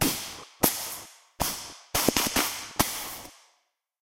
Indy Blow Darts Cue 3
Blow darts being fired at a running man.
blow, dart, film, movie, radio, sfx, sound-effect, tv